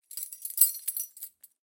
Keys Jingling 2 2
Lock, Keys, Door, Real, Foley, Key, Design, Rattle, Jingling, Recording, Jingle, Sound